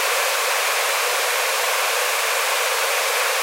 Want 100% pure hardstyle screeches then this is the stuff you want. Unforgetable is just that, unforgettable! It remains alot of early hardstyle screeches from a long time gone.
lead, nasty, hardstyle